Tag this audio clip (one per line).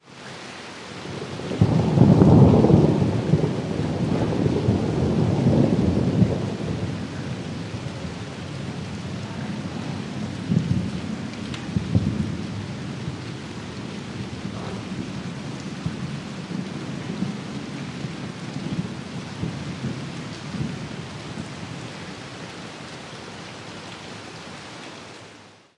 nature
weather
storm
rain
lightning
field-recording
thunderstorm
thunder